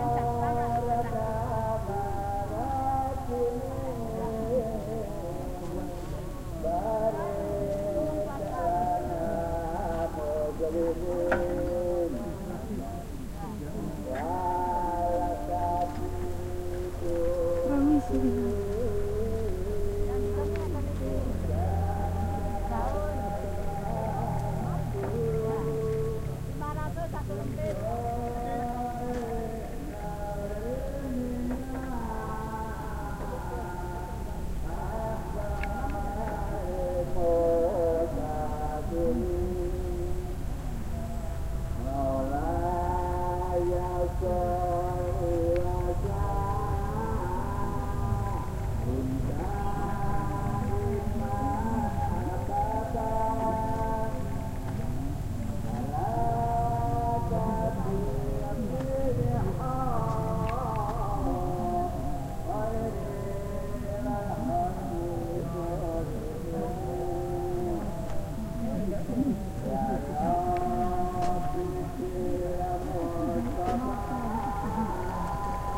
20070619 154452 moslim gebed
Muslim prayer audible in the rise fields around the Borobudur. Java, Indonesia.
- Recorded with iPod with iTalk internal mic.
indonesia, muslim, prayer